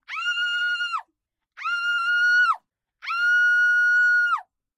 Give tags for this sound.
uam
scream
dying
screaming
agony
scary
5naudio17

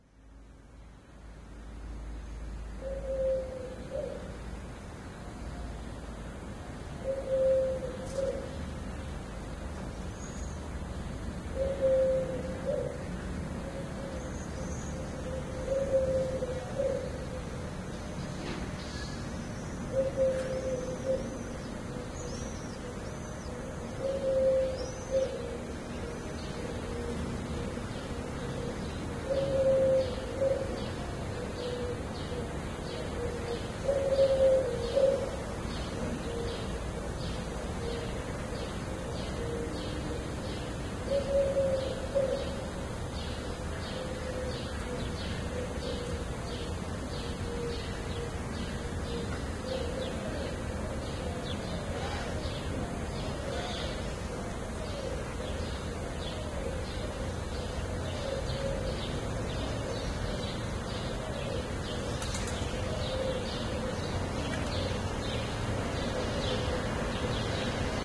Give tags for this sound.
street
field-recording
birds
belgrade
sumatovatchka
soundscape
dawn

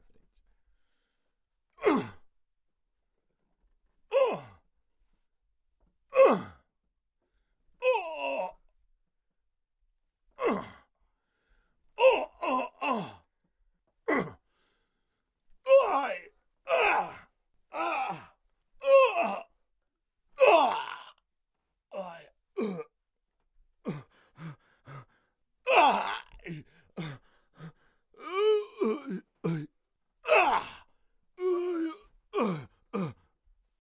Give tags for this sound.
aggression
angry
attack
breathing
fighting
hit
pain
punches

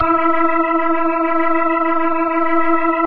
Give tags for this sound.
organ
rock
sample

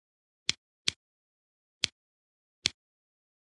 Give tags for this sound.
golpes
os
patadas
pu

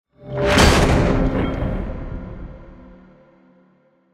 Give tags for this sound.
game
gameplay
reveal
stinger

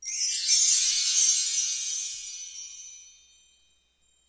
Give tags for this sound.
chimes
orchestral
wind-chimes
percussion
windchimes
glissando

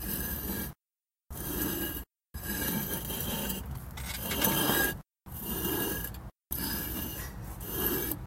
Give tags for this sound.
grinding
stones
scraping
rocks
shuffle
sliding

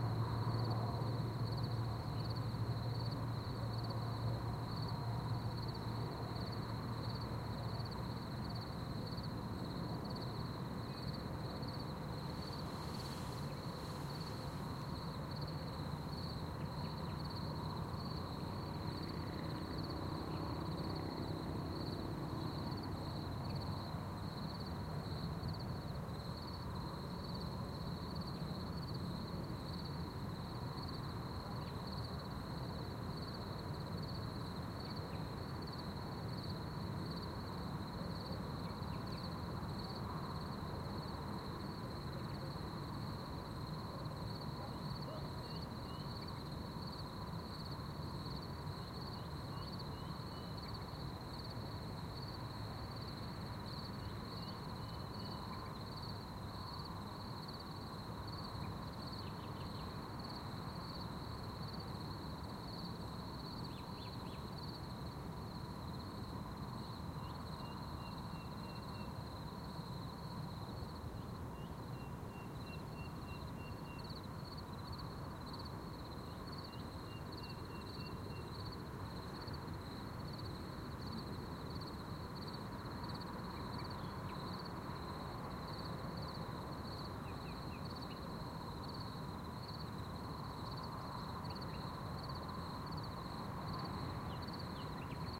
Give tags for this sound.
atmosphere
white-noise
ambiance
soundscape
country
ambient
relaxing
field-recording
night
background
nature
crickets
environment
birds
ambience
atmos

